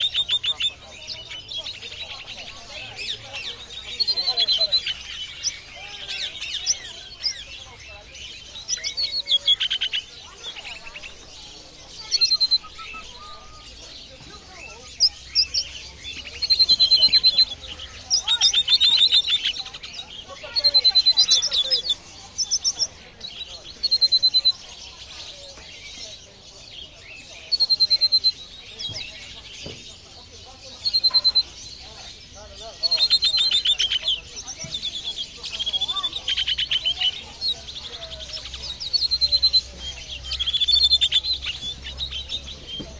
porto birdmarket 24
Porto, Portugal, 19.July 2009, Torre dos Clerigos: Tropical birds in cages on a birdmarket. Few birds whistling in foreground, other birds and human voices in the background.